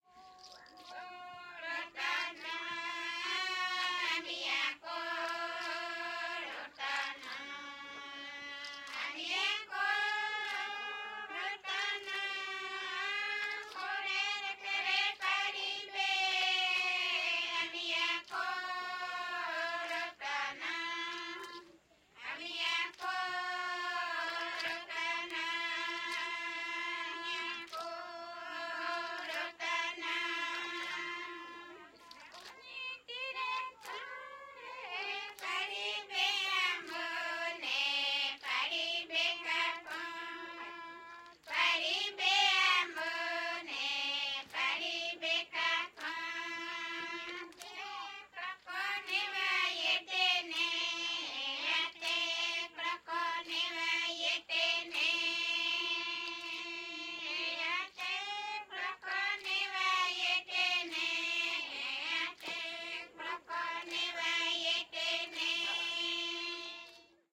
Nira's Song number 3 from the "Kayapo Chants". Group of female Kayapó native brazilian indians finishing the ritual of the warrior, in "Las Casas" tribe, in the Brazilian Amazon. Recorded with Sound Devices 788, two Sennheiser MKH60 in "XY".